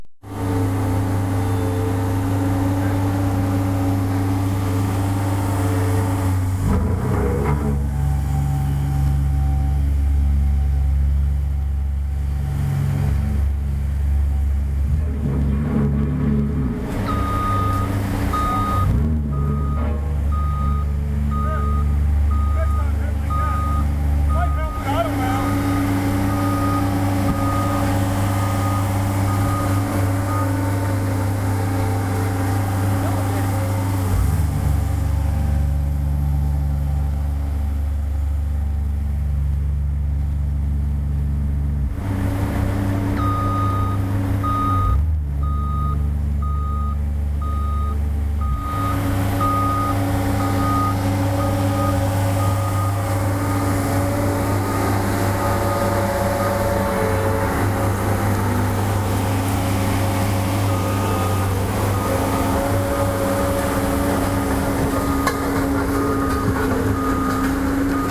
lackey070330 0906a steamroller2
Small paving roller, making a magnificent low roar, also mid-range engine noise (around 1K). Recorded with iPod, Belkin TuneTalk Stereo; regrettably, extreme audio compressor "pumping."
beeping, city, pavement, road, paving